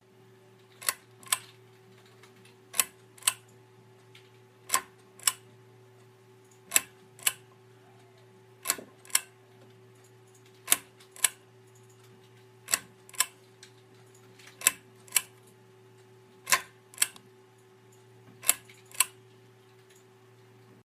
Light Switch Chain
This the sound of a lamp with a chain switch being turned on and off.